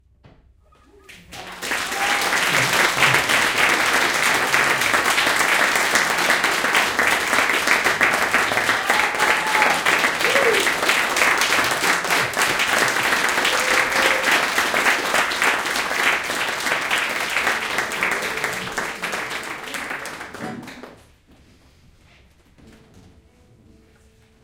241213 - Rijeka - Blumlein - Frano Živković 3
Applause during guitar concert of Frano Živković in Filodrammatica, Rijeka.
Recorded in Blumlein (2 x AKG 414XLS, figure of 8)
ambience, audience, hand-clapping, applaud, applause, aplause